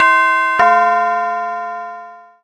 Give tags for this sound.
Bell; Ding; Door

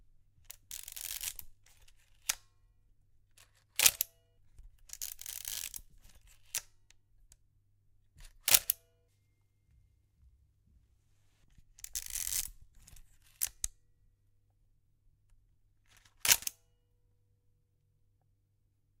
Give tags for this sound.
35mm; advance; analog; antique; camera; change; click; close; detail; dial; film; handle; iris; lens; lever; manual; mechanical; metal; movement; moving; old; photo; photography; ring; set; shutter; slr; still; up; vintage

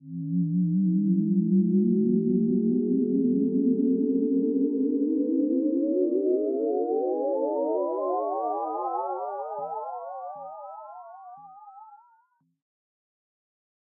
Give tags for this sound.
retro; oldschool; spaceship; ascending; landing; sci-fi; ufo; serum; space; sine; ship

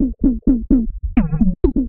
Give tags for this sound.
electronic; loop; percussion

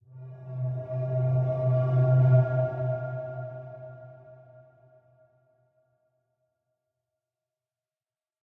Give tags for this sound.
ambient; film; fx; scoring; sound; spooky

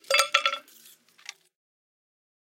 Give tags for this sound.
handling; axe; wood; stone; ching

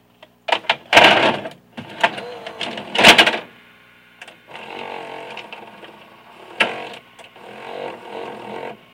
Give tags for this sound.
Insert; VHS; Videotape

